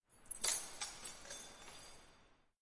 Keys Down Foyer Stairs
This recording is of keys being flung down the stairs of a library at Stanford University
key, keys, stanford-university